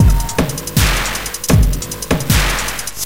Some Loop 001C BPM156-78
This is a variation of loop
Uses the following sound as most of the "dirt"
Although there is some dirty compression going on on the drums themselves and a bitcrushed room reverb.
This loop is 2 bars at 156.78 BPM.
dirty-loop, rhythm, drums, groovy, drum-loop